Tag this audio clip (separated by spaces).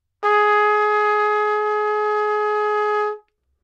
good-sounds Gsharp4 neumann-U87 trumpet